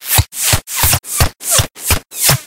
Several sounds of bullets whizzing and viscerally impacting on flesh
bullet, fire, firing, flesh, gun, impact, shoot, visceral